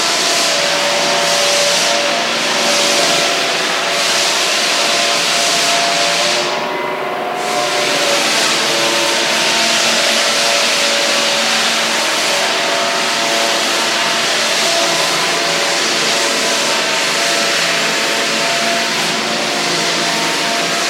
Floor sanding
The other day as I departed to my work, some neighbour had moved out or something like that. I had some time available. As I left my flat I heard that a restoration team had their way with the floors in the neighbouring apartment. I rushed inside, grabbed my ipad, went a bit closer, aimed the microphone towards the door, and pressed record. It's not much, but this sound is pretty loopable as it is. No need to record any more when it's pretty much the same sound over and over.